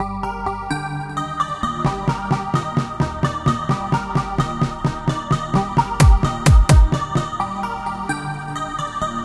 Intro Synth
Ambient looping synth with electronic ambient sound over the top, some drums for depth, could be useful as an intro or spacer in a track
drums, intro, piano, synth